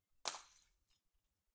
Bottle Smash FF145
1 light short beer bottle smash, hammer, liquid-filled
Bottle-Breaking, Bottle-smash, light